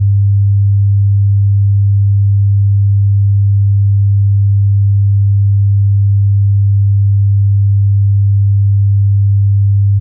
Set computer volume level at normal. Using headphones or your speakers, play each tone, gradually decreasing the volume until you cannot detect it. Note the volume setting (I know, this isn't easy if you don't have a graduated control, but you can make some arbitrary levels using whatever indicator you have on your OS).
Repeat with next tone. Try the test with headphones if you were using your speakers, or vice versa.
sine-wave, tone, hearing-test